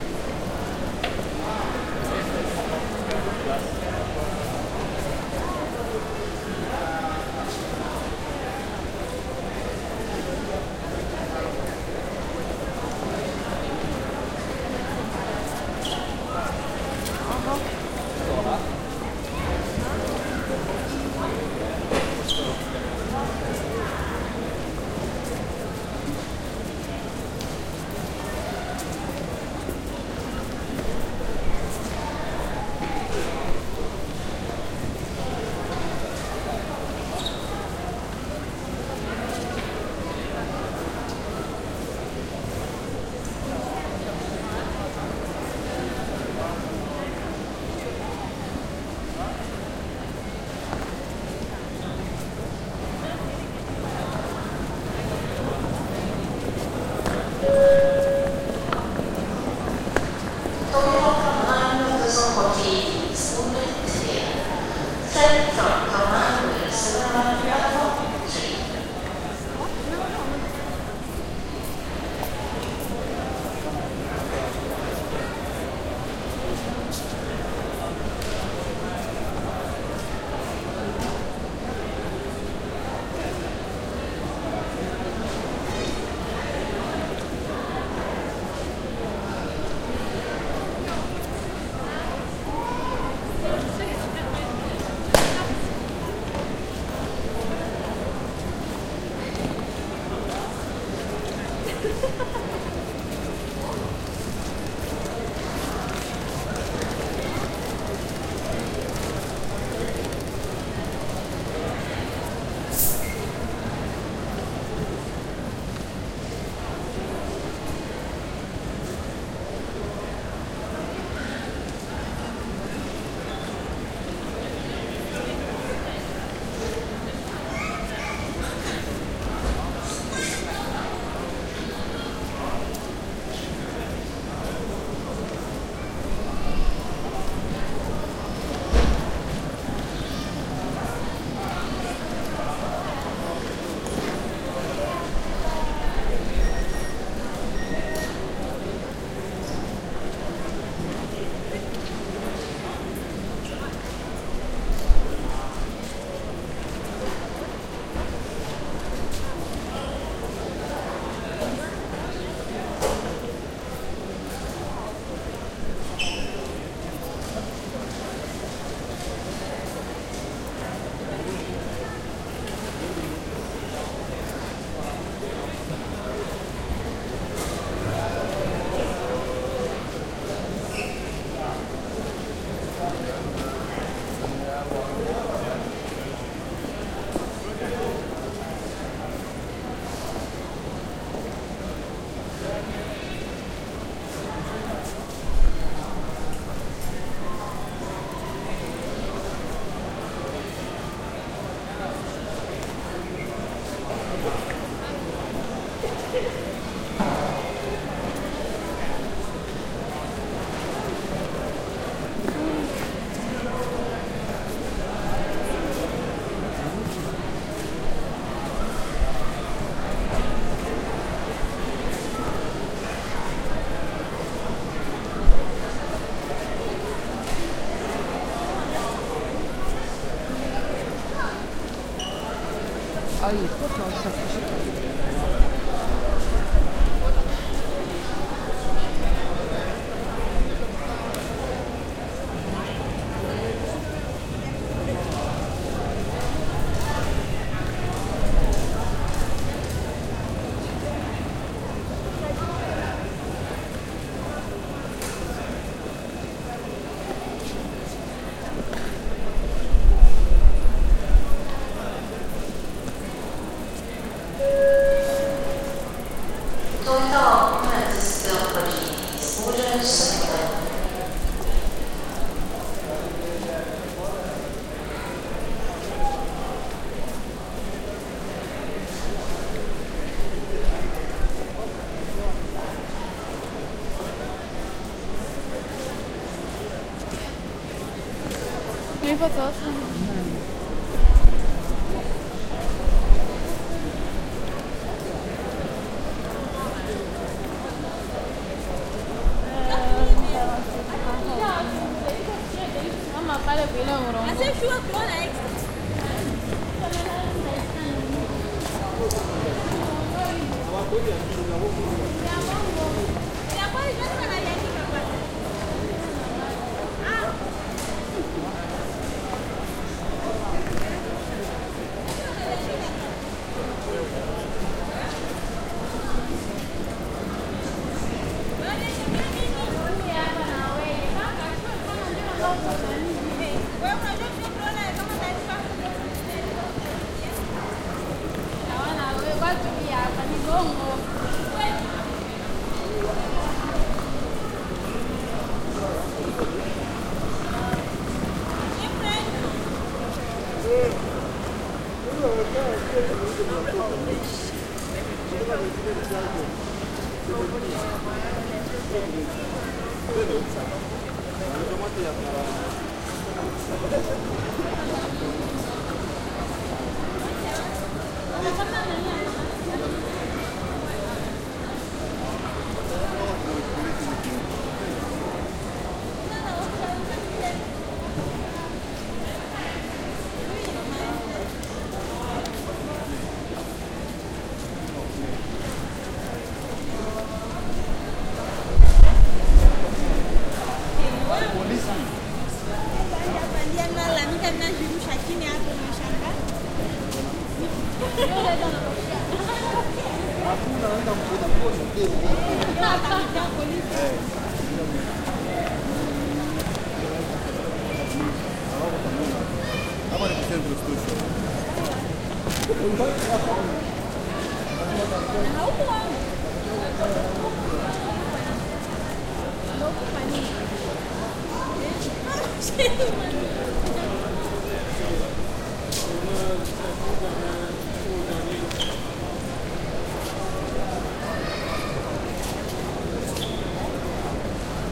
Field recording from Oslo Central Train station 22nd June 2008. Using Zoom H4 recorder. High Gain.
atmosphere, norway, norwegian, oslo, train-station